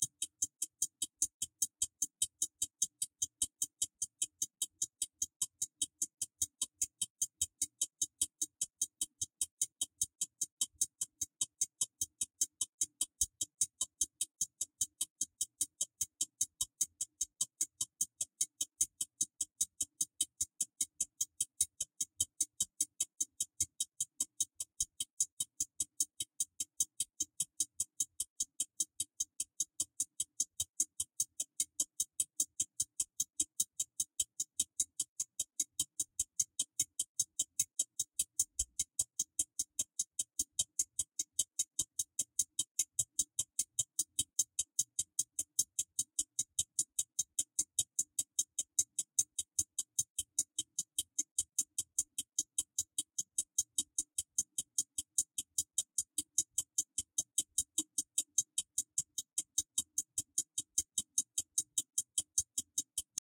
Ticking Stopwatch
A ticking analog stopwatch. No frills. Can be looped.
Analog-Stopwatch, Beat, Frenetic, Pulse, Stopwatch, Ticking, Timer